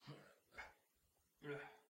A guy straining.
human, strain, straining